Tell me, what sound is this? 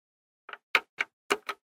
A Simple Button recorded with Zoom H6 In Studio Conditions Check out entire Buttons and levers pack!
sfx, button, sound, game, buttons, switch, button-click, click, push, press, plastic